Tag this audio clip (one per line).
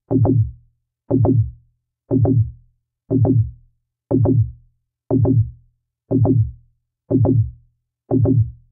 Mongo
Synth
Analog
W0
Modular